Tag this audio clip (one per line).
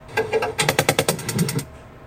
effect
freaky
sound
soundeffect
this
What